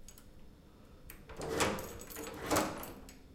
open lock 1
This sound is part of the sound creation that has to be done in the subject Sound Creation Lab in Pompeu Fabra university. It consists on a person opening a door.
close, door, open, squeak, UPF-CS14, wood, wooden